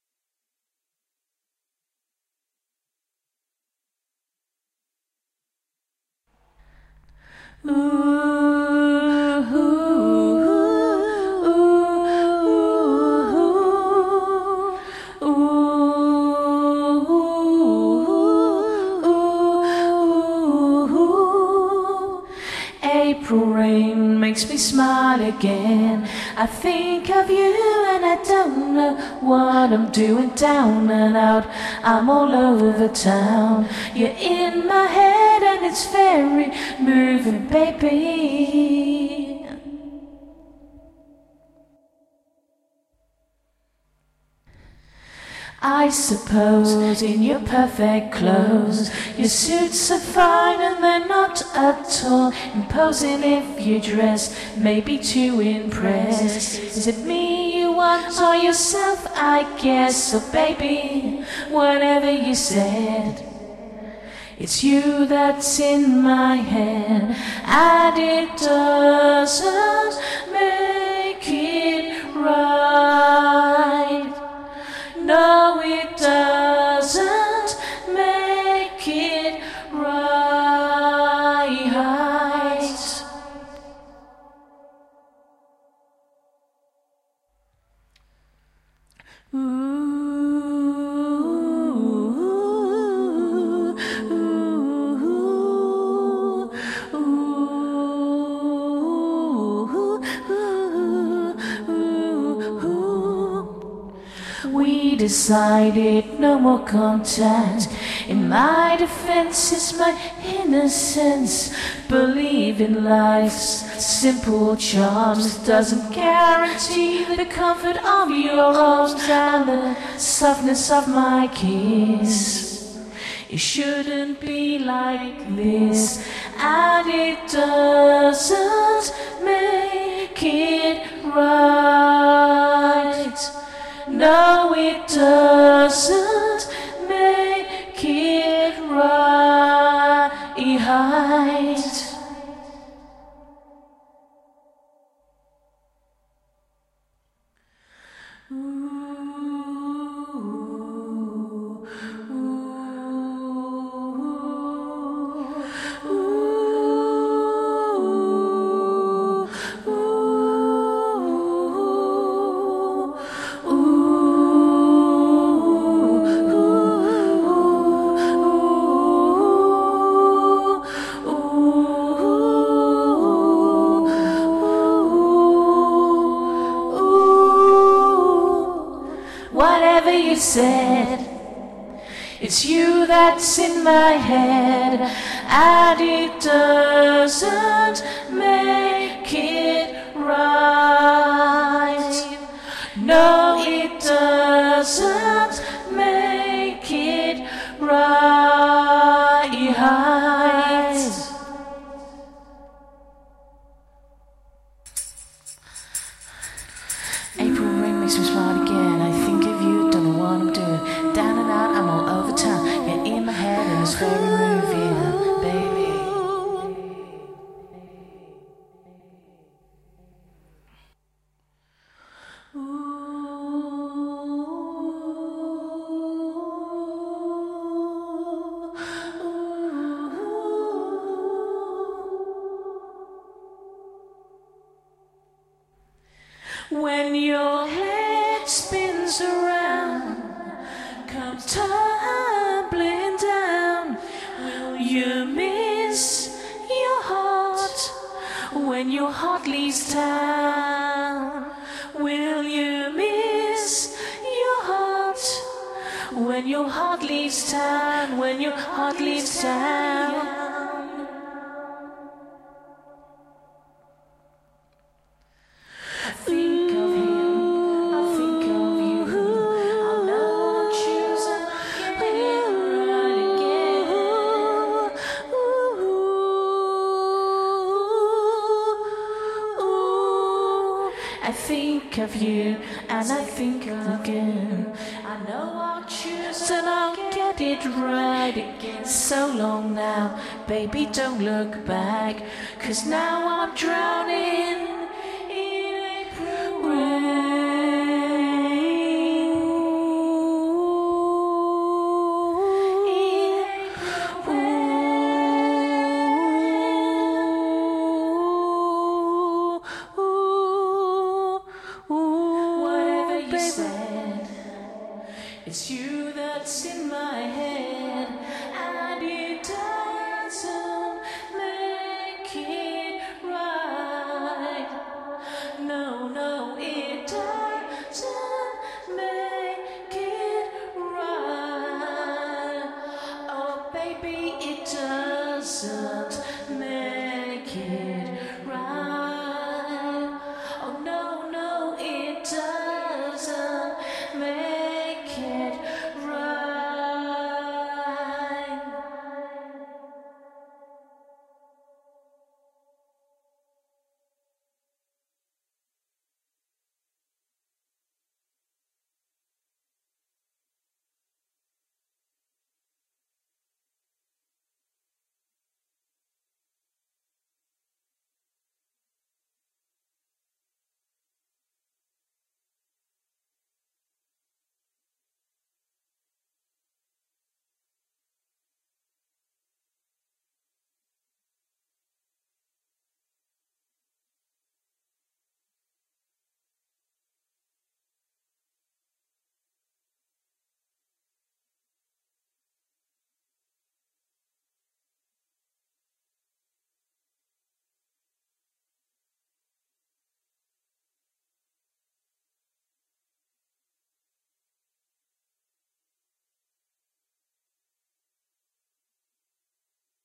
Hi, this is me doing a song of mine, called April Rain, vocals only.... you can do what you want with this vocal, I'd love any feedback on what its been used for.many thanks :-)
damp, female, field, mix, recording, slightly, song, voal, vocals, whole